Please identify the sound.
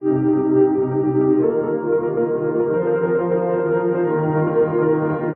hg piano loop creator kit 90 bpm 8 beats 001
90 bpm 8 beat loop.